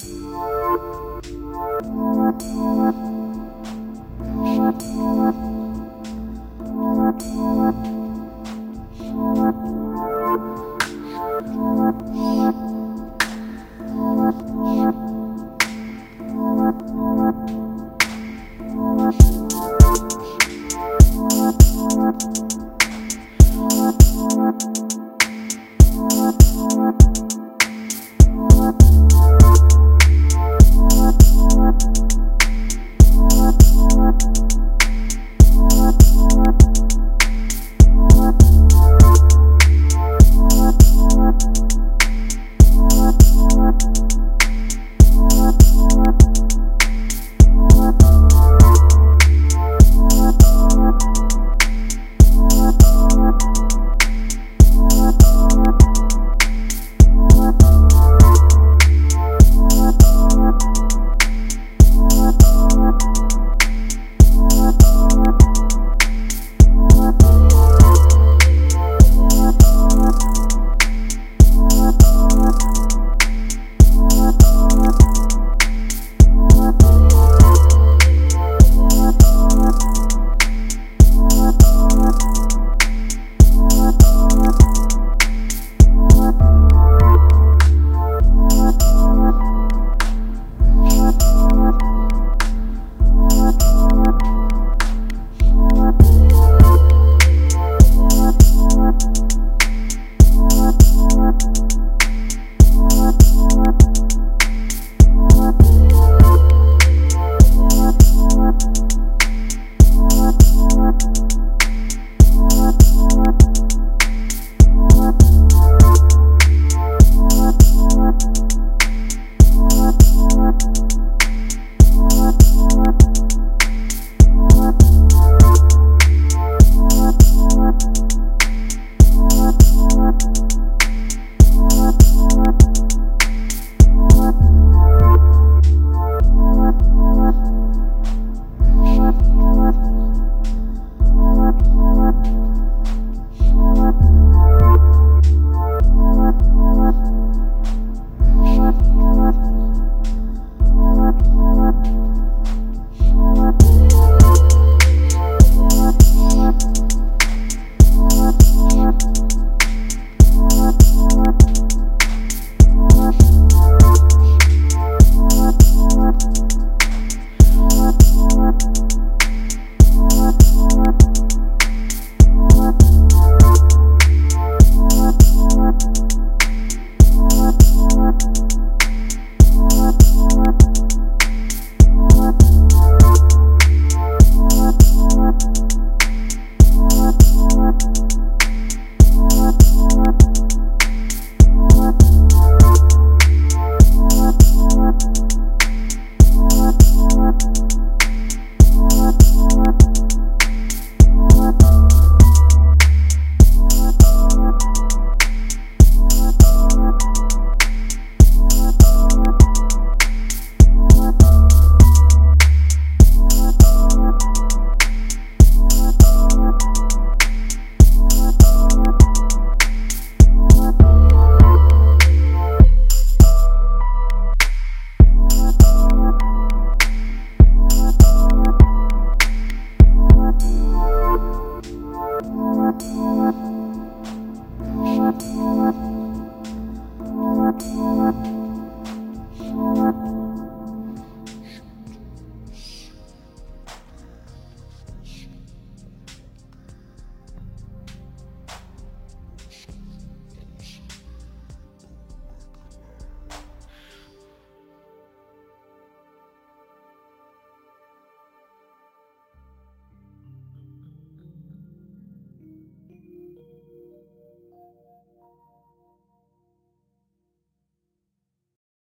This is a beat i made on easybeats app . its a calm dark sounding type of instrumental.
beat, chill, dark, Hiphop, instrumental, lofi, music, Rap, RnB, slow